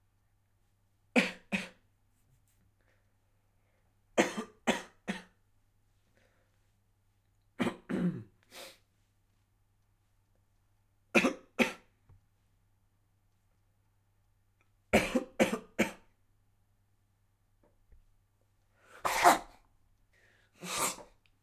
Different types of coughs from clearing throat to splutter.